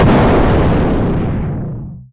An explosion handcrafted throught SoundForge's FM synth module. 6/7